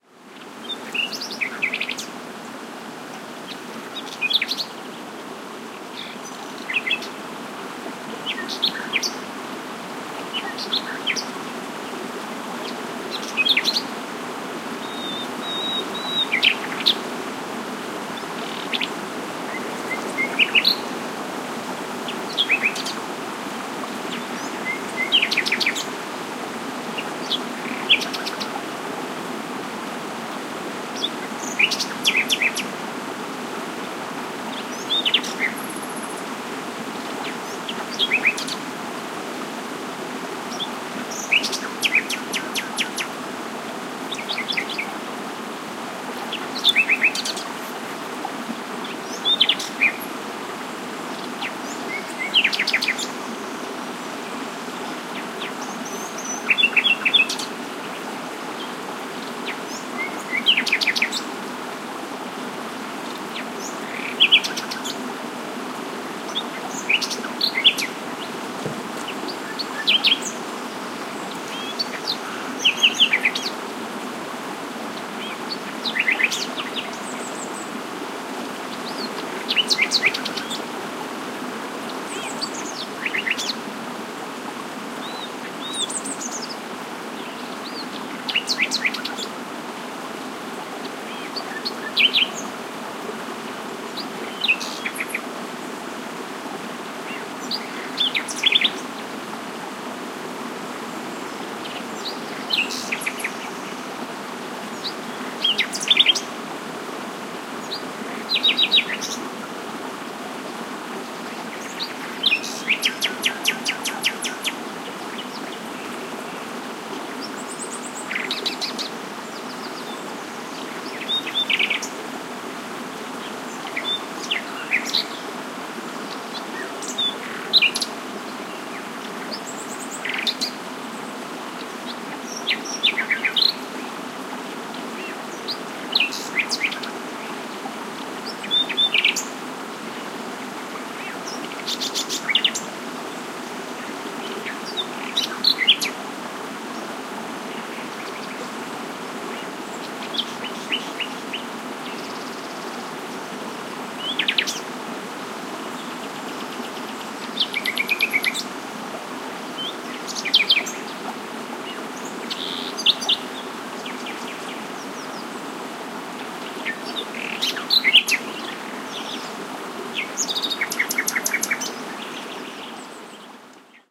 20160414 nightingale.stream.09
Nightingale singing in foreground, stream babbling in background. Audiotechnica BP4025 inside blimp, Shure FP24 preamp, PCM-M10 recorder. Recorded near La Macera (Valencia de Alcantara, Caceres, Spain)